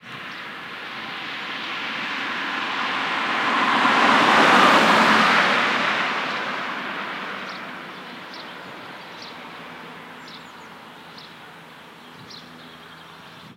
Sound of a passing car. Recorded with a Behringer ECM8000 lineair omni mic.
purist, traffic, field-recording, car, road, cars, passing